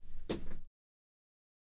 Salto madera
jumping on wood floor
hit, jump, wood